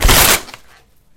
ripping a paper bag
rip, bag, tear, paper